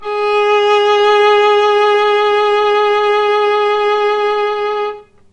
violin arco vib G#3
violin arco vibrato
vibrato, violin